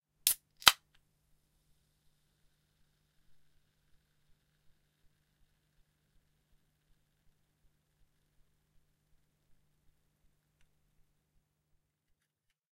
Fizzy Drink Can, Opening, C
Raw audio of a 330ml Pepsi can being opened. The initial fizz after opening is also present.
An example of how you might credit is by putting this in the description/credits:
The sound was recorded using a "H1 Zoom recorder" on 14th April 2017.
pepsi, drink, can, carbonated, opening, soda, fizz, coke, fizzy